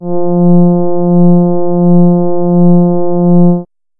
An analog synth horn with a warm, friendly feel to it. This is the note F in the 3rd octave. (Created with AudioSauna.)